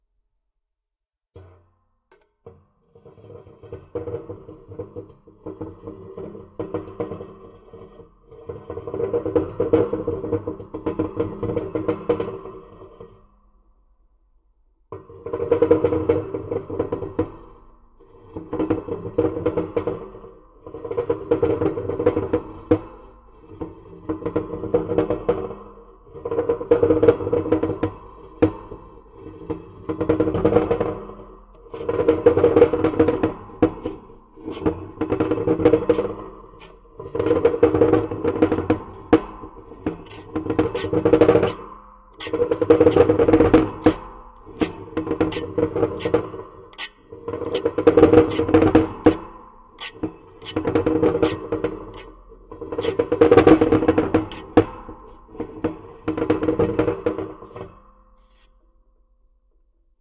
contact mic on satellite dish03
Contact mic on a satellite dish, rubbing a piece of scrap metal against it.
clack, clacking, contact-mic, metal, metallic, piezo, scrape, scraping